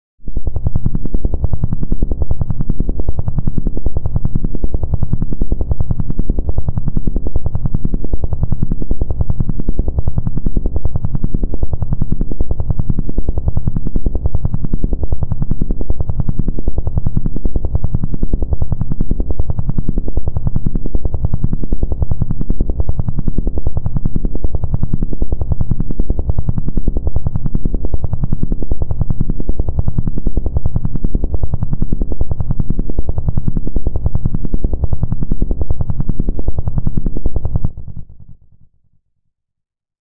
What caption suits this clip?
LOW DRONE 005 (Fast Beat)
drone, background, ambience